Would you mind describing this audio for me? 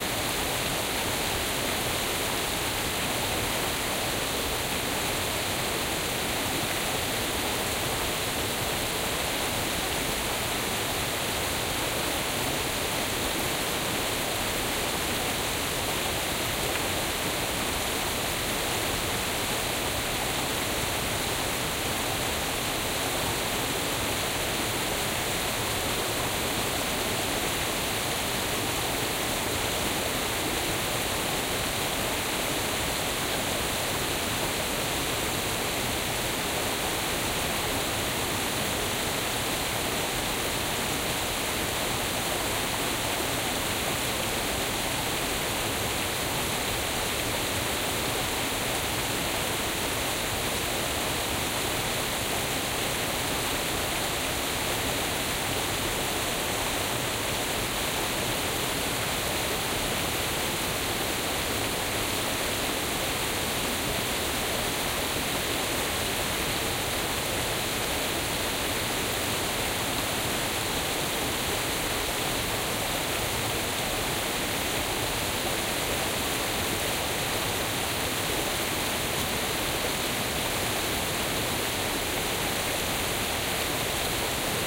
Waterfall/Cascade in a canyon of the northern mountains at the Isle of Mallorca after heavy rainfall the night before. OKM Binaurals, Marantz PMD 751.
nature, water